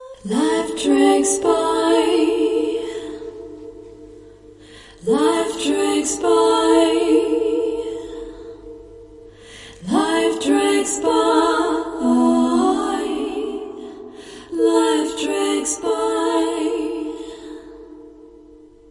"life drags by" sung
Harmonizing vocal tracks, singing "life drags by" multiple times. The clip preview might have squeaks and sound irregularities, but the download is high quality and squeak free (or shall I say "squeaky clean"?). No mice here.
Recorded using Ardour with the UA4FX interface and the the t.bone sct 2000 mic.
You are welcome to use them in any project (music, video, art, interpretive dance, etc.). If you would like me to hear it as well, send me a link in a PM.